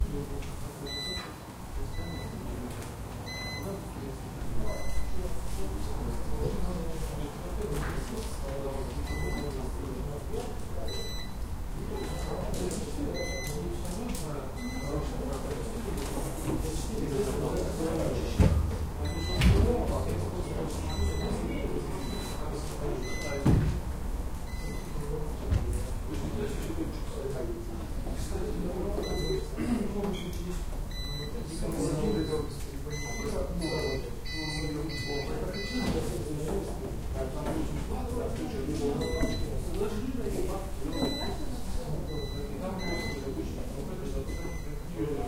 Office and UPS sounds 3
Suddenly electricity was lost in the office and UPS start to squeak.
Recorded 2012-09-28 03:15 pm.
AB-stereo
noise, office, pule, russian-speech, speaking, squeak, talks, UPS, UPS-sound